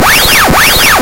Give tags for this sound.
sonnerie cell-phone phone ring-tone cellphone bytebeat ringtone

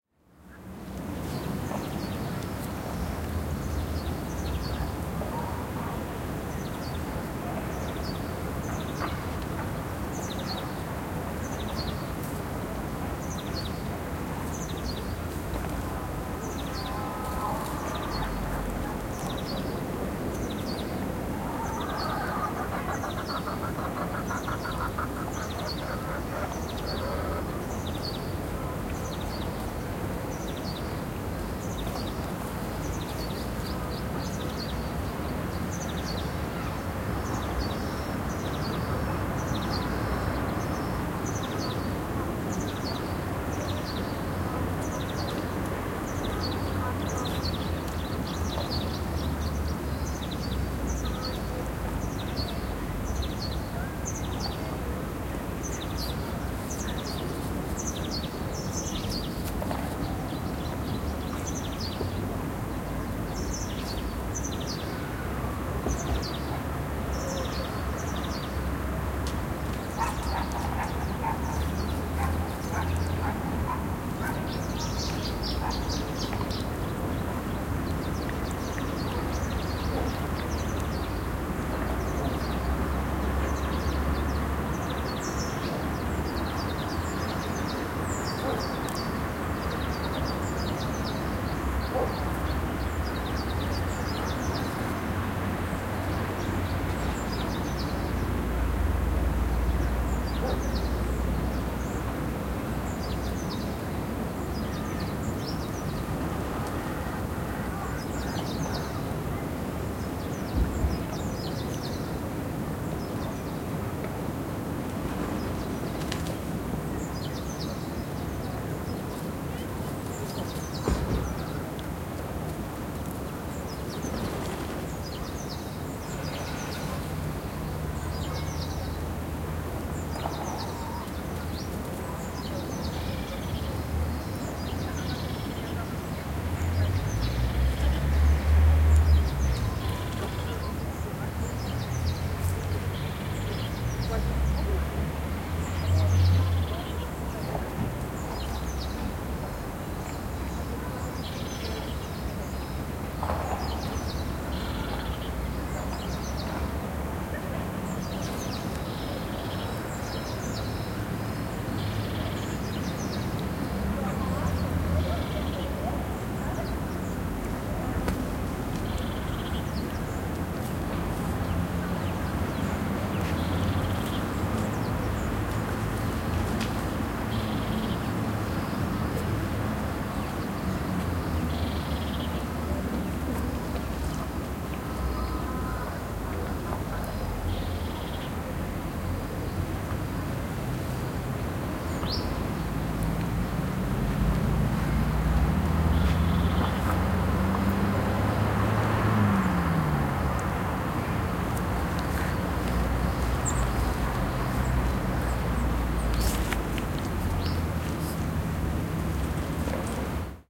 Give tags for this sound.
ambience chicken dusk field-recording rooster

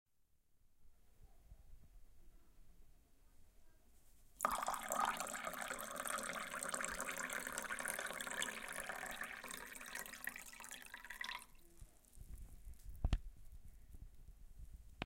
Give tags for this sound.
pour water dripping liquid glass flow drain

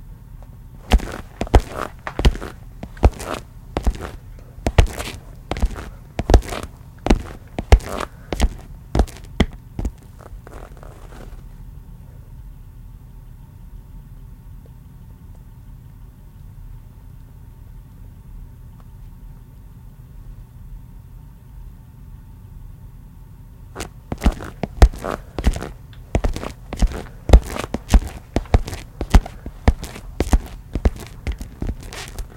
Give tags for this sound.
loafers male shoes

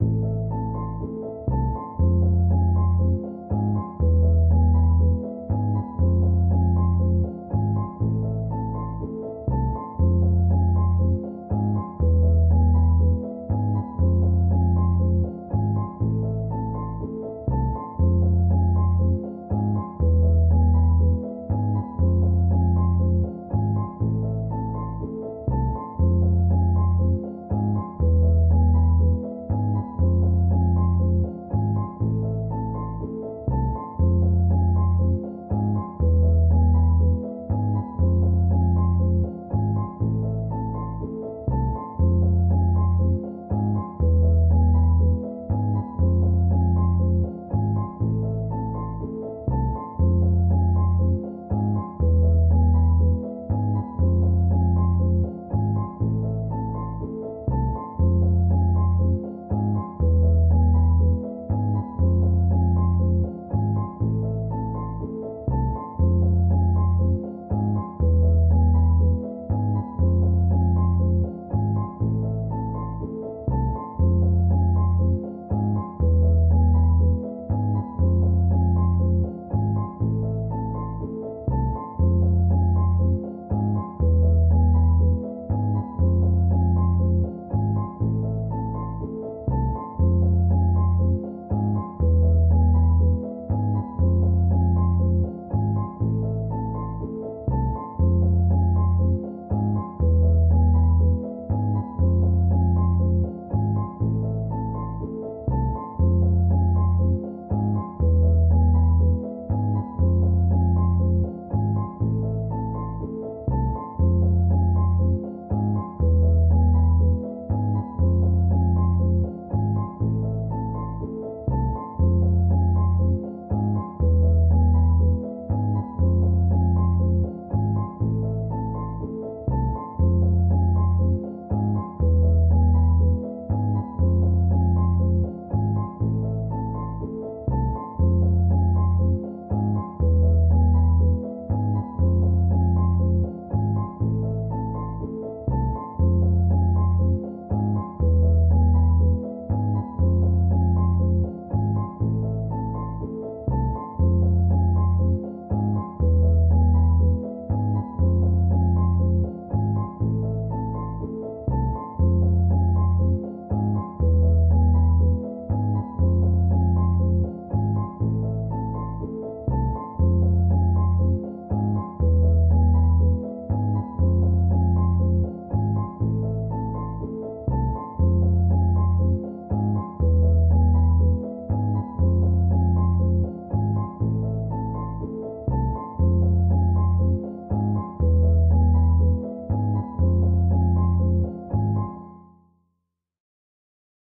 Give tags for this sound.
60 60bpm bass bpm dark loop loops piano

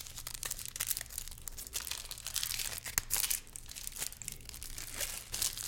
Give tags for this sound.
candy unwrapping

